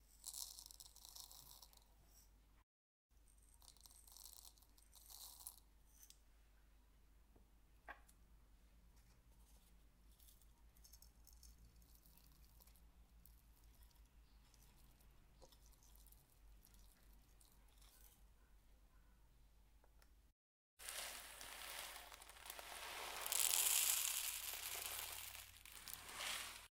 FOODCook Pouring Spices Into A Mortar 01 JOSH OWI 3RD YEAR SFX PACK Scarlett 18i20, Samson C01
pouring spice into a mortar and pestle i poured pepper salt and cumin seeds
cracking, marble, mortar, OWI, pepper, pestle, pour, pouring, salt, spice